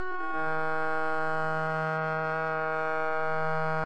Canada Goose Expanded 1
A time expanded goose, sounds a little like a stringed instrument or some type of horn - weird!
canada-goose, time-expansion, bird